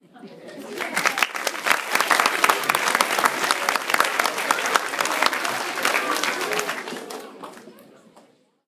Large crowd applause sounds recorded with a 5th-gen iPod touch. Edited in Audacity.